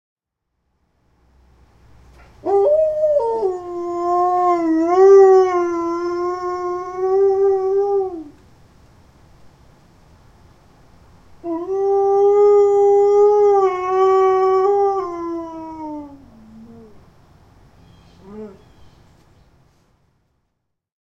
Boris Morning Moan 1
Our Alaskan Malamute puppy, Boris, recorded inside with a Zoom H2. He is apt to moan in the morning when my wife leaves.
bark, moan, malamute, husky, howl, Wolf, growl, dog